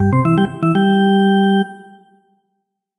Play Ball! v2
The classic old time "play ball" tune, synthesized in Noteworthy Composer.